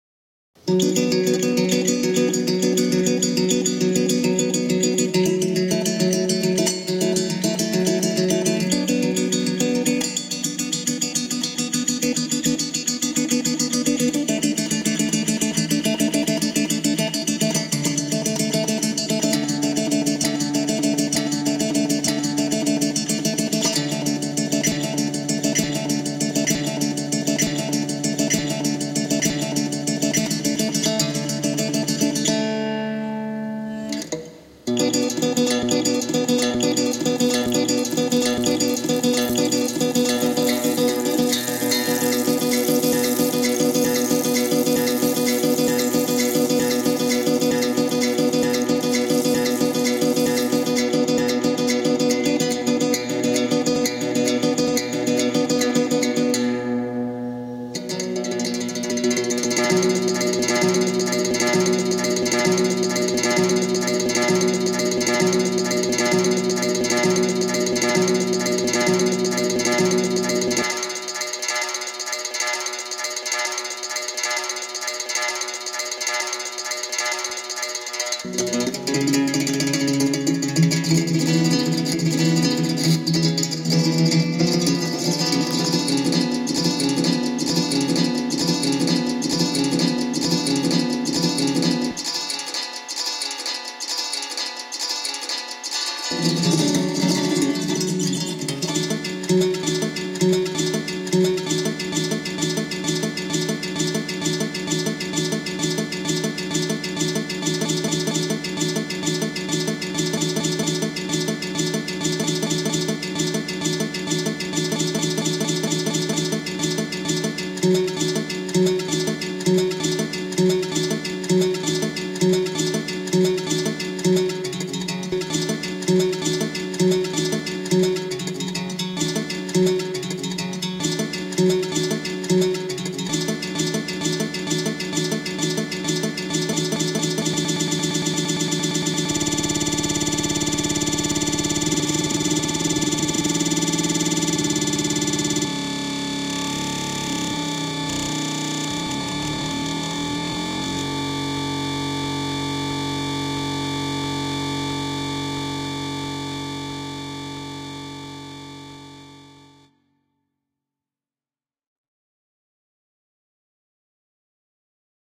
Guitar loop large DJ
electronic, electro, house, Guitar, loop, Acoustic, psyco